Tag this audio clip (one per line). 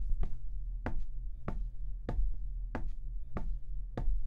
footstep
footsteps
walk
walking